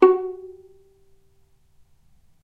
violin pizz vib F#3
violin pizzicato vibrato
vibrato, pizzicato